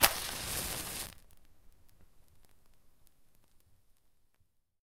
Striking Match
Striking a match.
flame, match, strike, striking